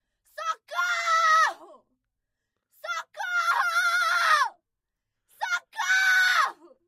Cry for help - Female
Woman screaming for help in portuguese.